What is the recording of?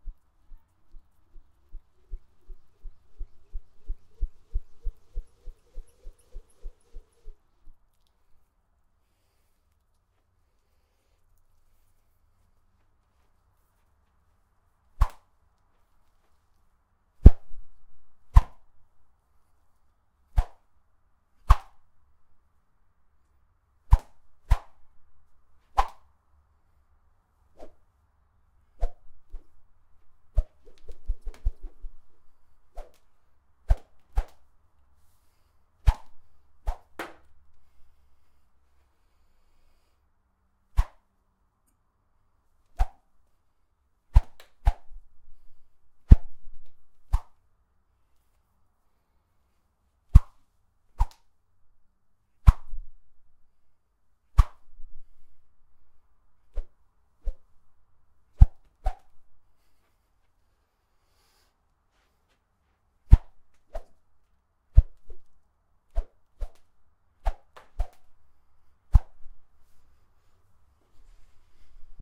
Whip Dry
Whip, Metal, Whoosh